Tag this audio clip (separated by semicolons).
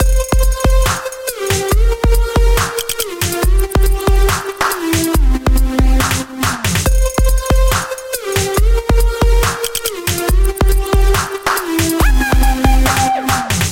bass; drum; drums; dubstep; electro; loop; synth; synthesizer